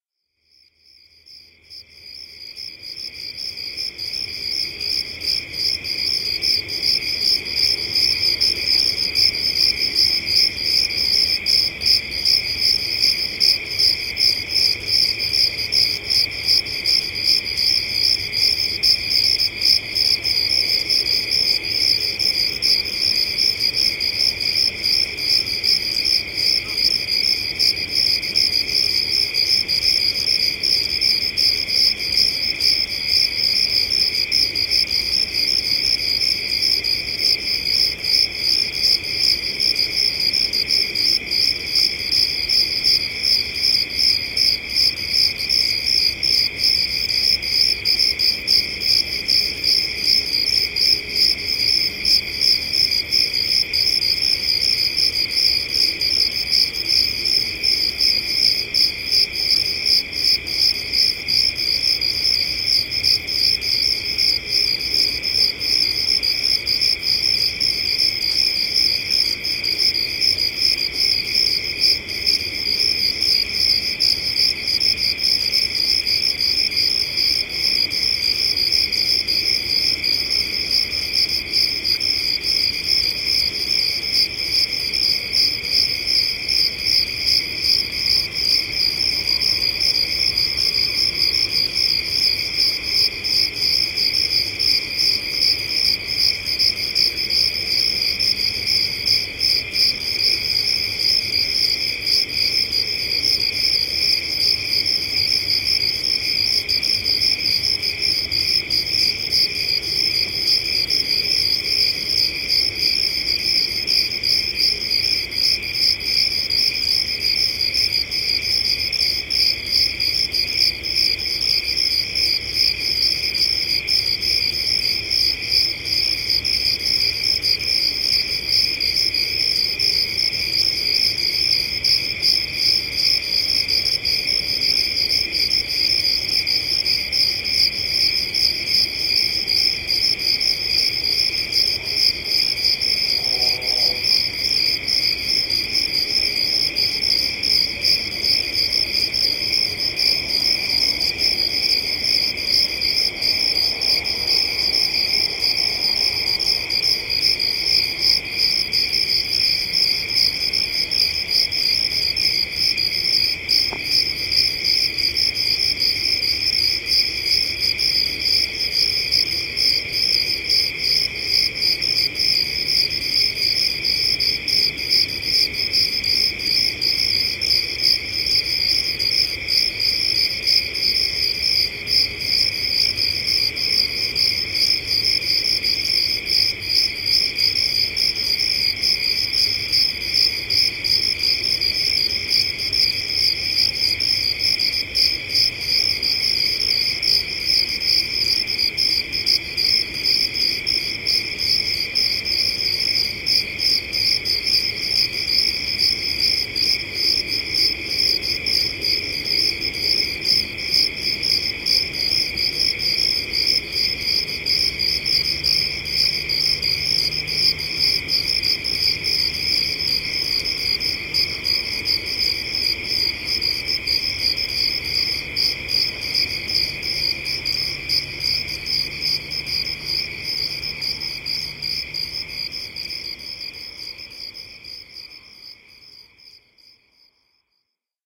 sherman 29aug2009tr16
ambient, crickets, california, sherman-island